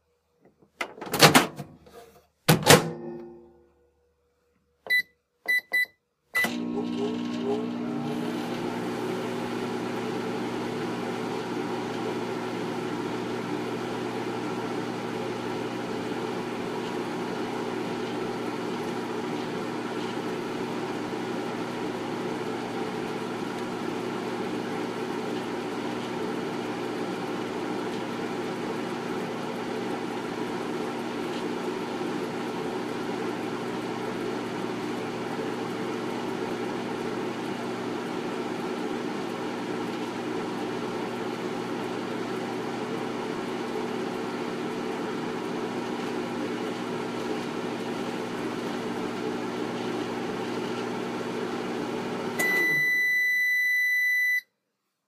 Microwave sounds
Opening the door; closing the door; pushing some buttons; the microwave running; and the piercing beep to let you know it's finished.
Recorded for the visual novelette/FMV game, "Who Am I?"
beep, beeping, buzz, buzzing, cook, door, drone, food, hum, humming, microwave, microwaving, run, running